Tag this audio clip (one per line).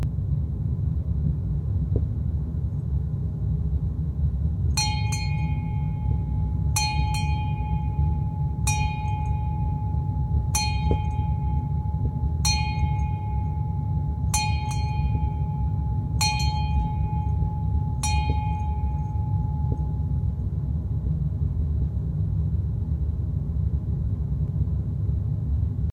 office
jobs
home
ships